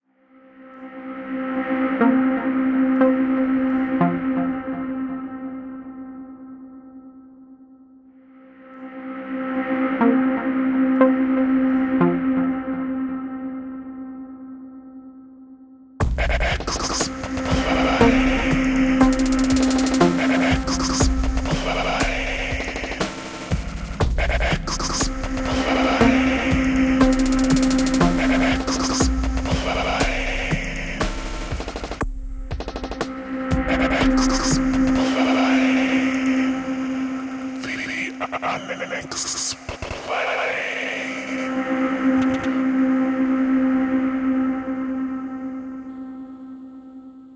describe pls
atmospheric sample that i made with Ableton